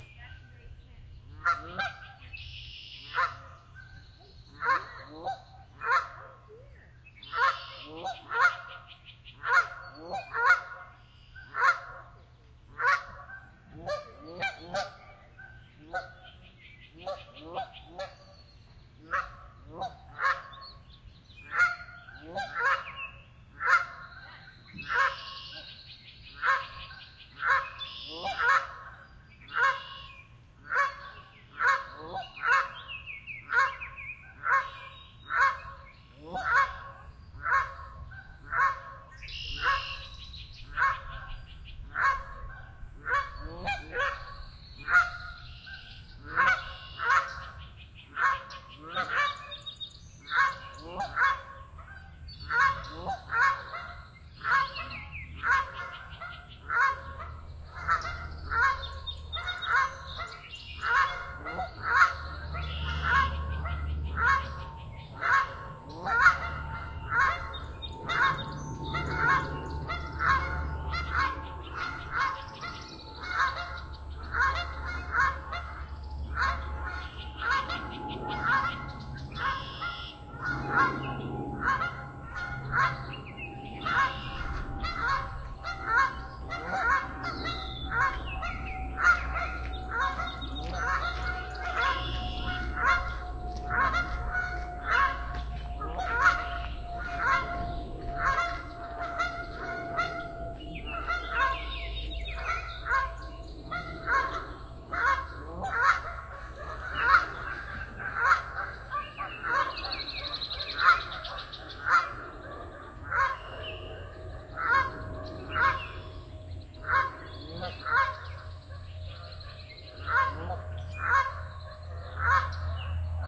Some Canada Geese honking very loudly in the early morning in High Park in Toronto. Lots of other birds around too and an airplane, along with people walking by.